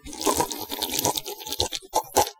sipping a drink
drink, liquid, sip, sipping